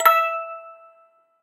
metal cracktoy crank-toy toy childs-toy musicbox
childs-toy, cracktoy, crank-toy, metal, musicbox, toy